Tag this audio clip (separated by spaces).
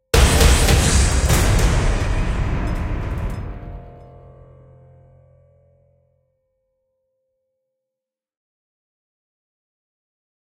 bass beat dance deep drum end-trailer epic-drum epic-sound horror kick loop movie-trailer mystic rhythm trailer trailer-end transition-beat tribal